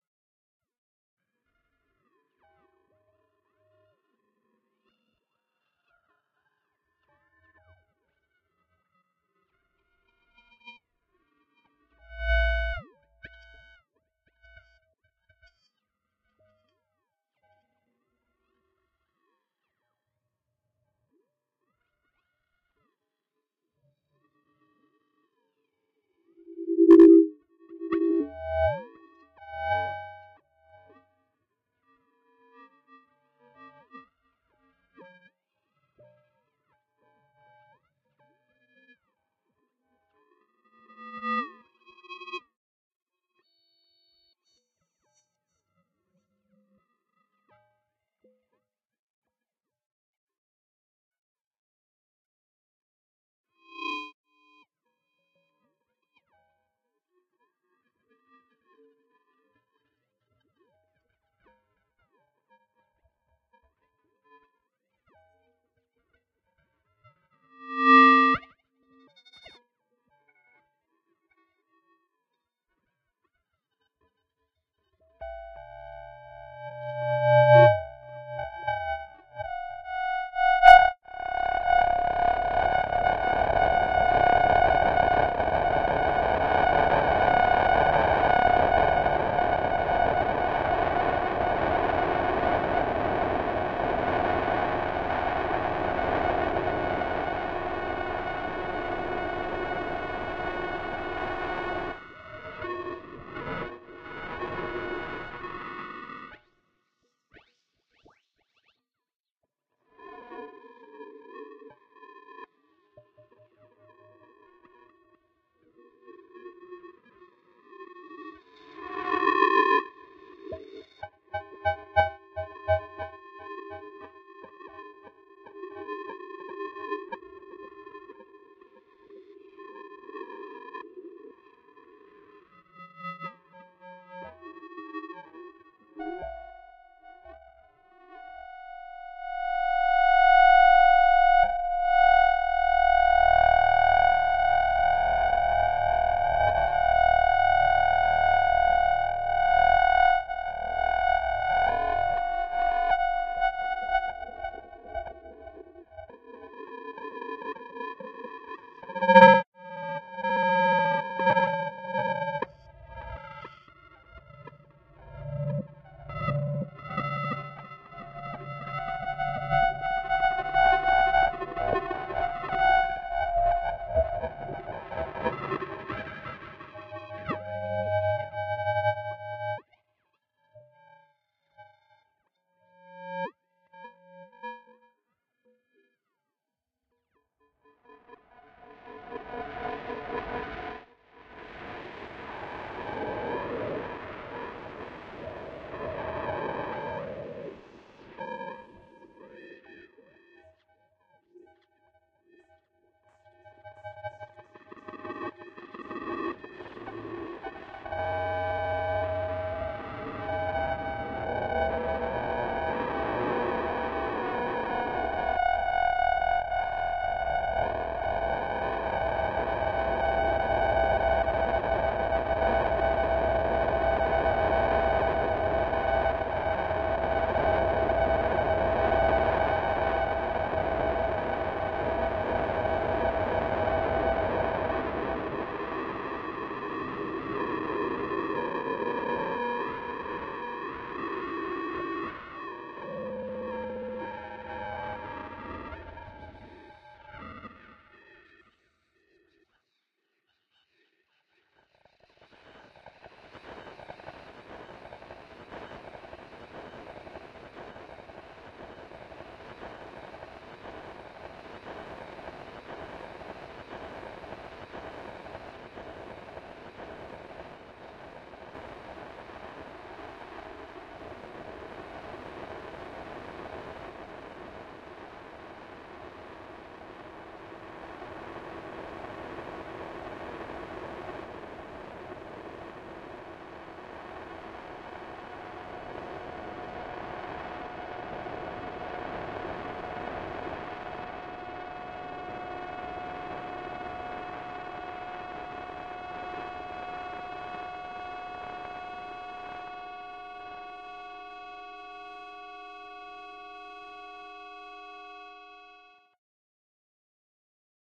Digital Modulation and Feedback 01
Feedback and interferences created with Pro Tools HD 10 and plug-ins for sound designers and sound artists.
Modulating-amplitude, Noise